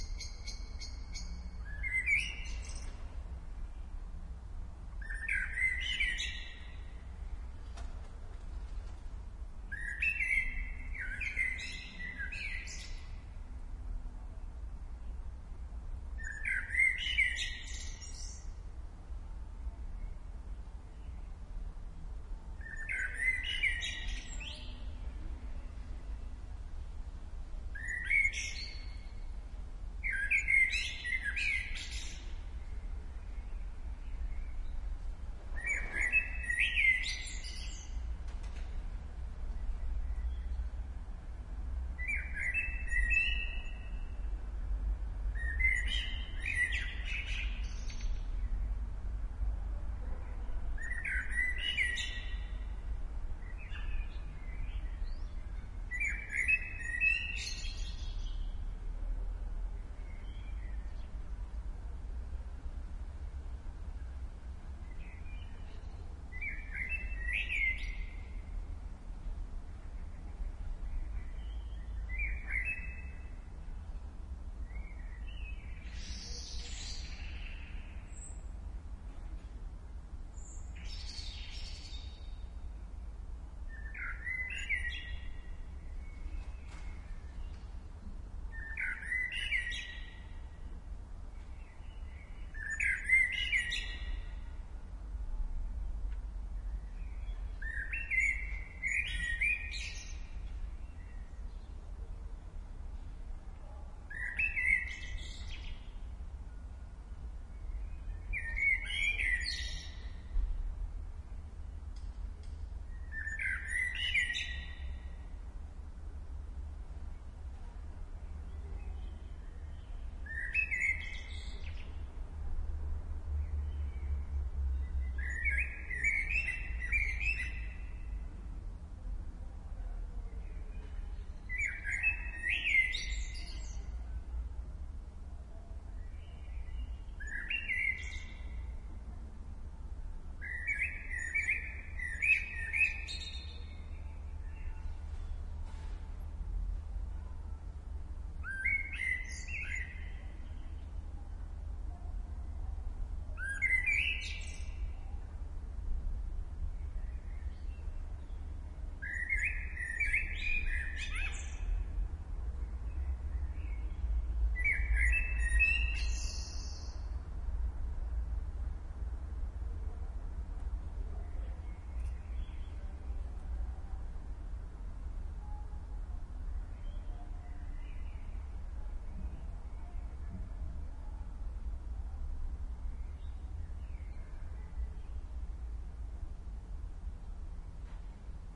Same location, slightly different gear and once again the sound of
springtime. In a few month it will be a rare thing to hear a blackbird
sing. This track was recorded with a couple of Shure WL 183 microphones and the FEL Battery Microphone Amplifier BMA1 and the iriver ihp-120 recorder.
bird, field-recording